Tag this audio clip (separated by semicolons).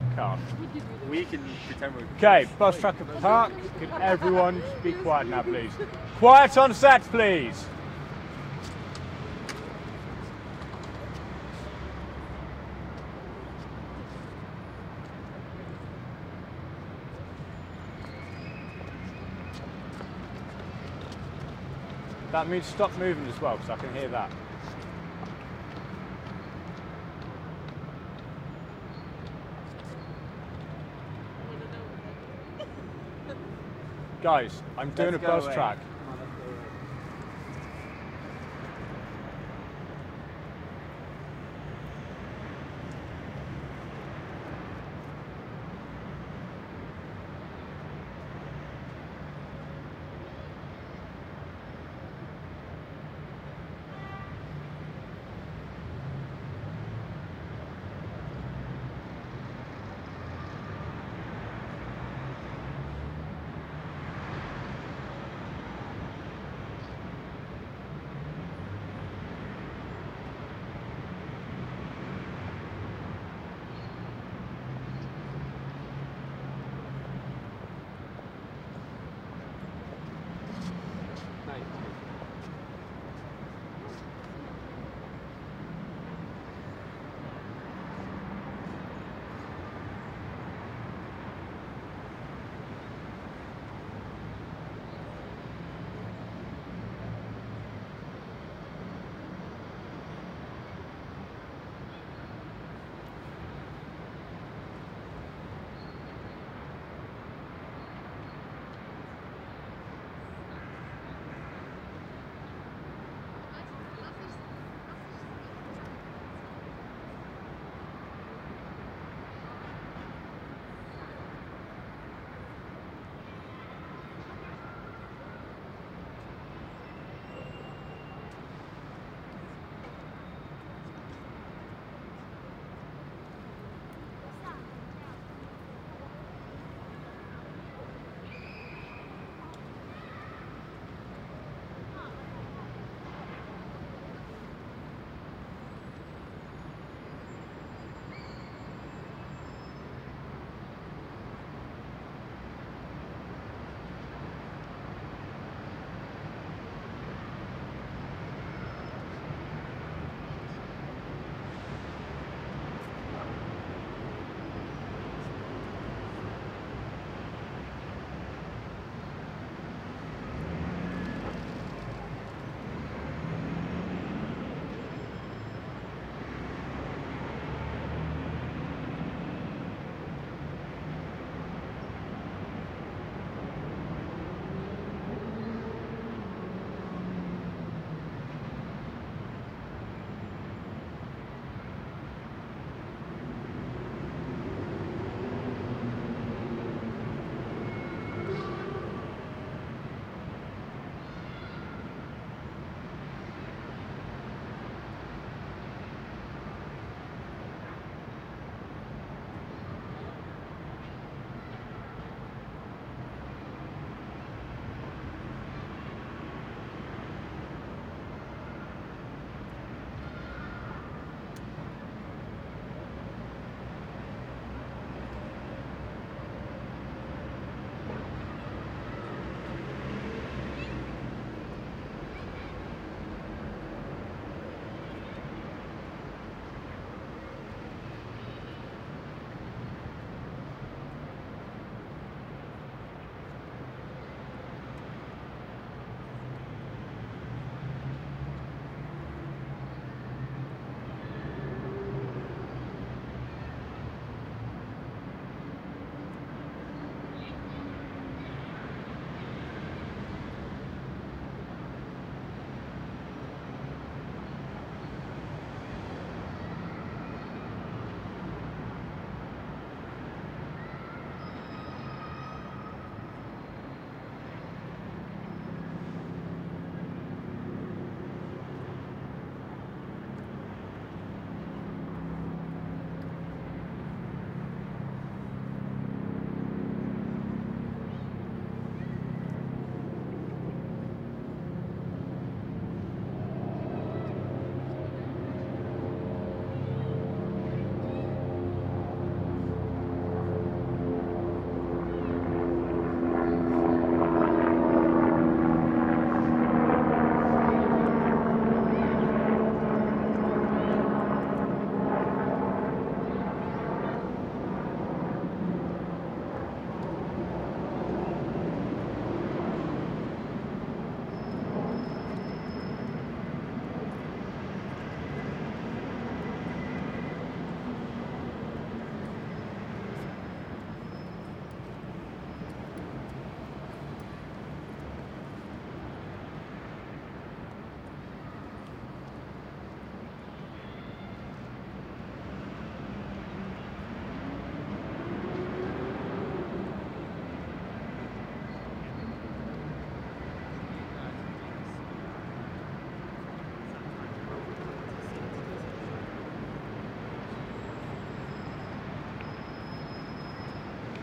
London; Park; Field-Recording